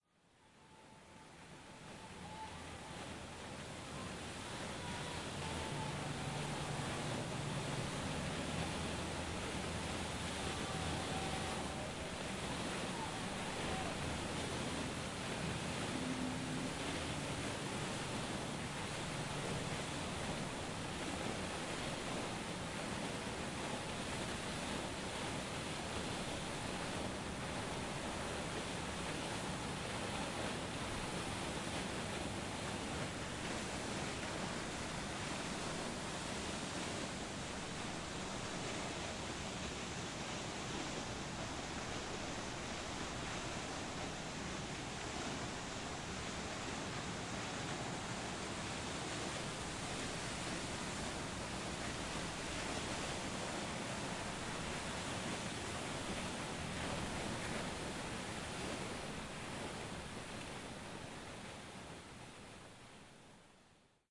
Recording of the fountain in JFK Plaza (a/k/a Love Park) in Philadelphia, Pennsylvania, USA.